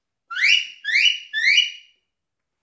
A stereo recording of a sheepdog whistle command.That'l do means job done. Rode NT4 > FEL battery pre-amp > Zoom H2 line in.
sheep, dog, command, whistle, sheepdog, xy, whistling, stereo